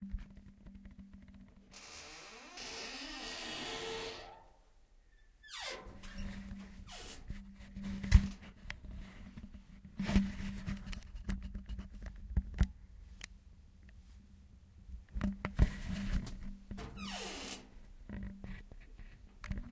My dryer door creaking recording from my zoom h1.